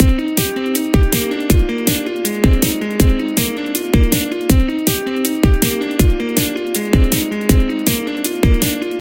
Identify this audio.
House loop.
Mixed together and edited in Audacity.